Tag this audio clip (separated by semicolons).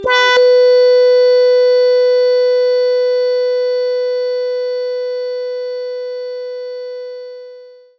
organ; multisample